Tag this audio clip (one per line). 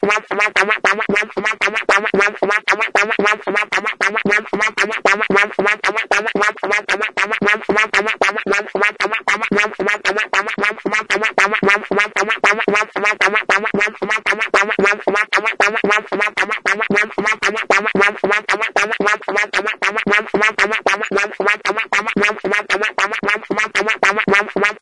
beat
electronic
drums